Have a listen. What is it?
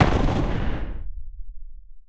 fx explosion
Bomb explosion. Two explosion sounds mixed with a low frequency sine tone.
bomb, explosion, xplosion